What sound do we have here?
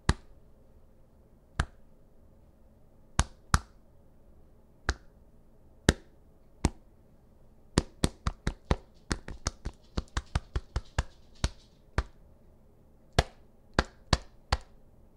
punching-bag
The sound was created by pounding a fist to a chest.
body, pound, punch